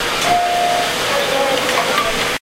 Doors to subway close with beep.